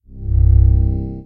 strength respawn
video game sounds games